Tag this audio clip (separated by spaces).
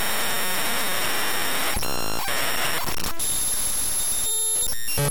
audacity clipped data-bending data-bent glitch